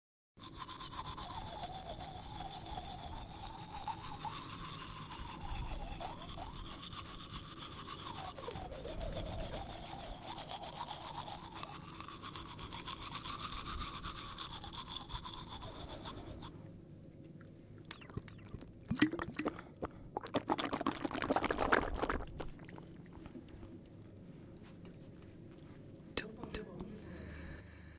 Escova de dente e agua/Efeito sonoro gravado nos estúdios de áudio da Universidade Anhembi Morumbi para a disciplina "Captação e Edição de áudio" do cruso de Rádio, Televisão e internet pelos estudantes: Bruna Bagnato, Gabriela Rodrigues, Michelle Voloszyn, Nicole Guedes, Ricardo Veglione e Sarah Mendes.
Trabalho orientado pelo Prof. Felipe Merker Castellani.